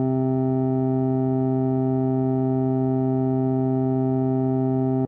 TX81z wave6
A raw single oscillator tone from a Yamaha TX81z. Also sort of a half cycle sine wave.
digital, electronic, loop, raw, sample, synth, synthesis, tone, tx81z, wave, yamaha